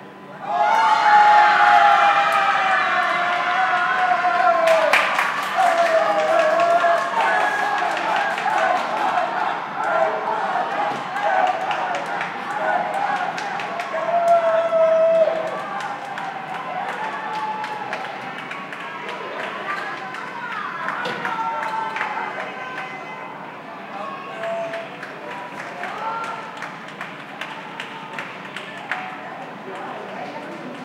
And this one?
in the heat of night (air conditioners noise can be heard) people cheer the victory of the 2008 European Football Cup by Spain, on June 29th.
clapping, football, voice